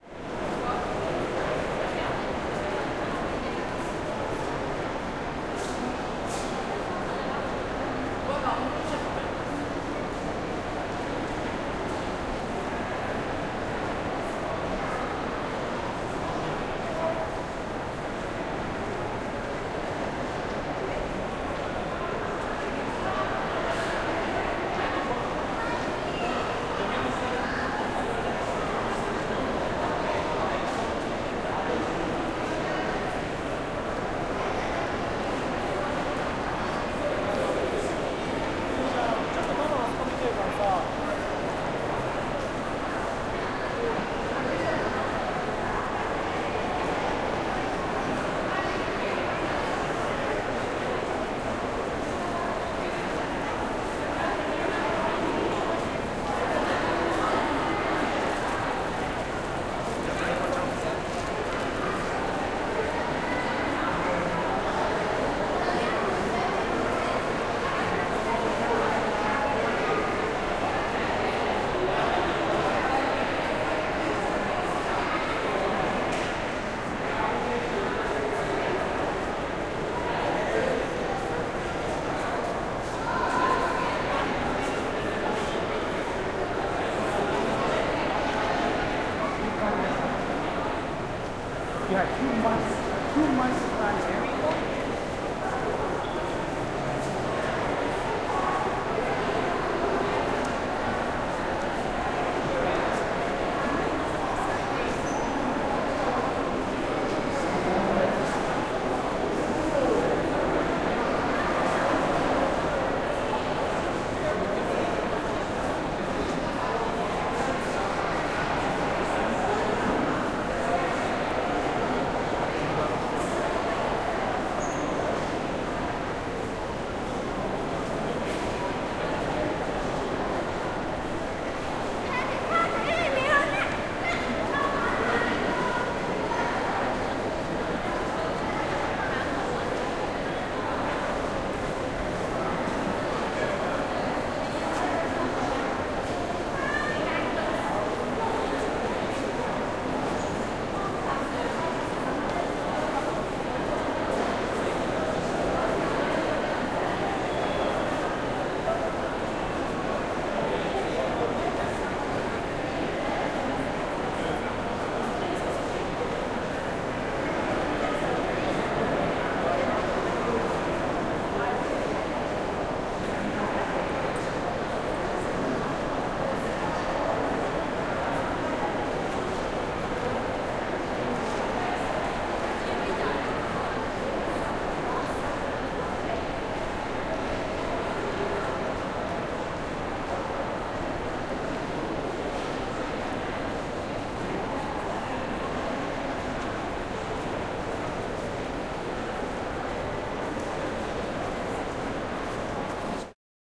Enregistré avec un Zoom H6 120°
Captation de l'ambiance du Carrousel du Louvre
Beaucoup de gens donc beaucoup de bruits avec une large réverbération
Recorded with a Zoom H6 120°
Ambiance of the Carrousel du Louvre
Lot's of people so noisy ambiance with a large reverberation